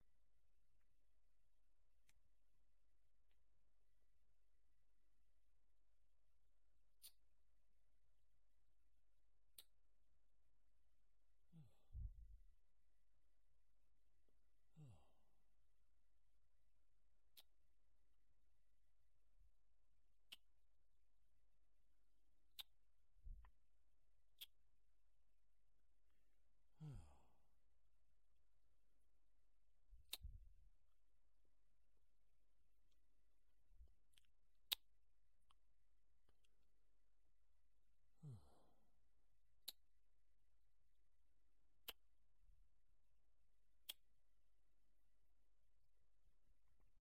variety of tuts
Tutting as in the kiss of your teeth in annoyance or disappointment at someone or thing. Recorded on Tascam DR-40
annoying, kissing, mouth, noise, teeth, tut, tutting, utterance